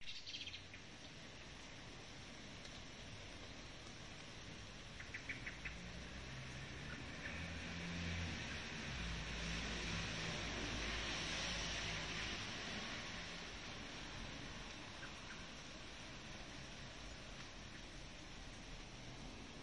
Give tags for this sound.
uccellino bird macchina chirping field-recording car

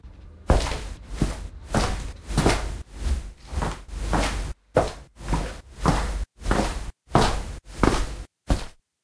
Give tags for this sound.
collection concrete indoors interior shoes step steps walking wood

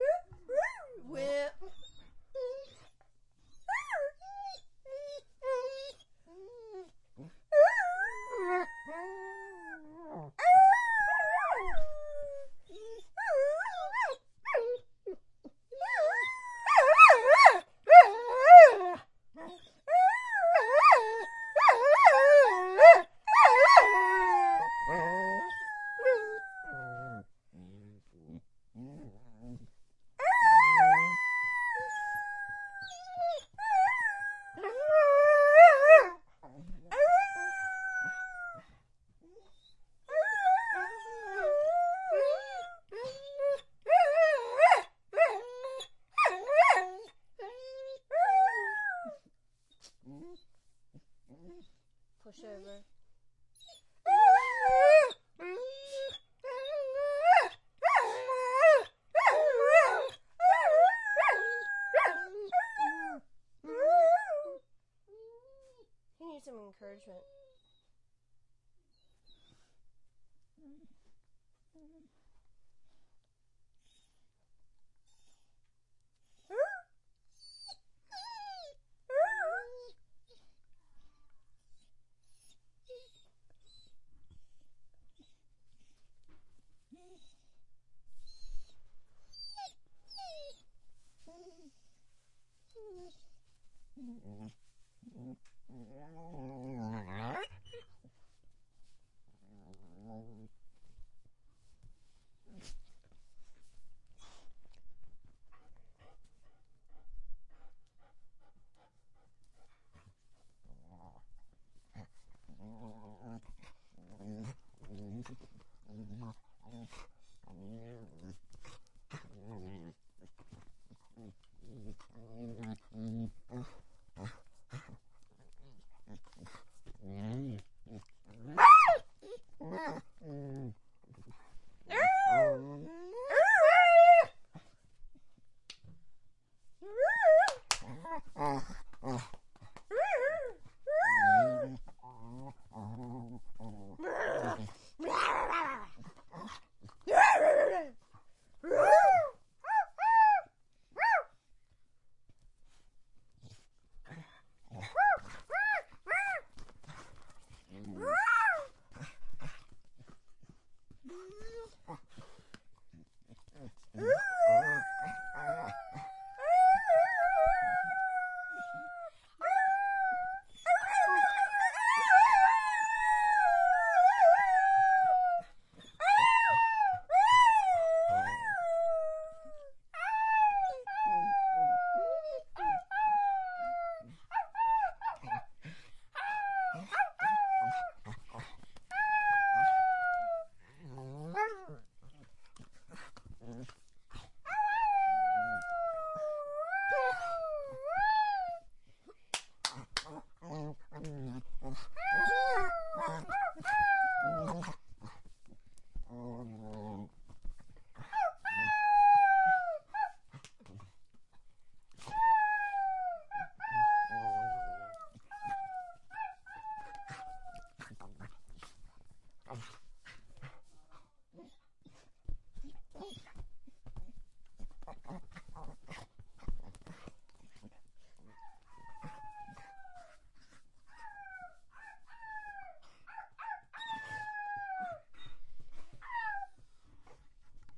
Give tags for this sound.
Chocolate recording MKII begs howls field Dog dr-07 begging Labador tascam

